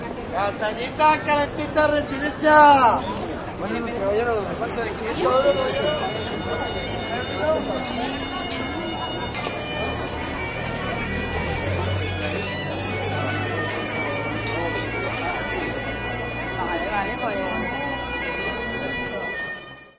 20081218.chestnut.vendor
a guy advertises roasted chestnuts, in Spanish (for those curious he says 'tasty hot chestnuts, freshly roasted, how many you want sir, 500 Euros?'). Street noise and a piper can be heard in background. A typical winter sound, recorded at Plaza de San Francisco, Sevilla (Spain). Edirol R09 internal mics
voice, male, sevilla, field-recording, spanish, vendor